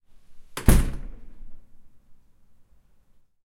Door Shut
closing, door